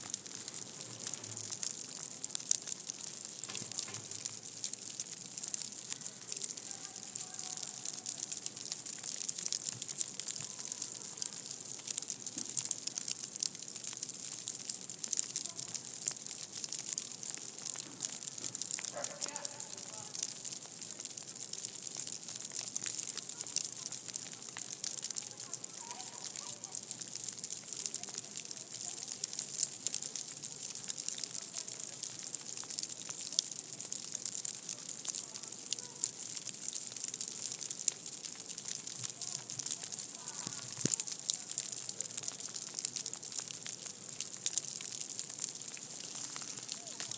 snow on leaves
Sound of snow pellets colliding with dry leaves of a tree. Some evening city ambience as well.
Recorded by Sony Xperia C5305.
dry, field-recording, leaf, leaves, snow, weather